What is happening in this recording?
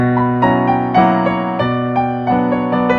waltz op 69 no 2 b minor 2
Short fragment of Chopin's B minor waltz recorded on Yamaha digital piano.
sample, piano, classical, yamaha, music, chopin, frederic, digital, waltz